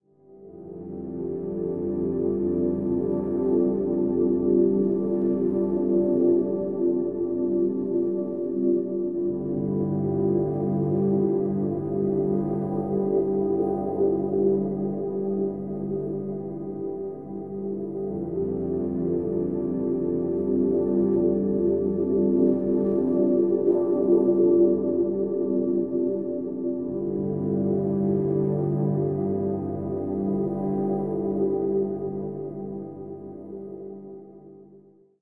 icing-nicely
it's amazing what can be done with one piano chord and a little effect - a simple field recording take from a wurlitzer upright that used to be my grand mothers... processed with ableton and various reaktor effects.
atmosphere, lush, piano, pretty